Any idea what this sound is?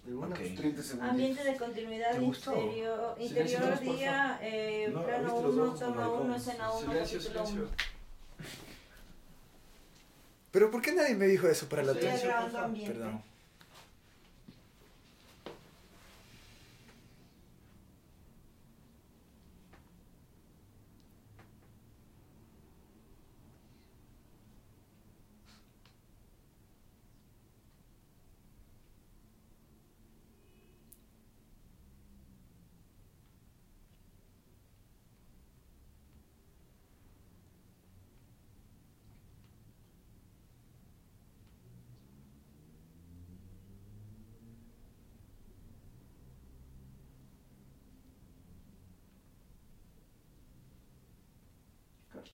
Ambiente Ciudad Día 1
Ambiente en interior de la zona centro norte de la ciudad de Quito-Ecuador al medio día. This sound
it´s mine. Was recorded with my Nh4 in the film "La Huesuda" in Quito-Ecuador. It´s Totally and definitly free.